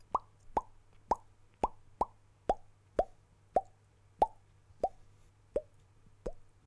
Me making popping noises with my lips.
Recorded with AT 2020 condenser mic in adobe audition.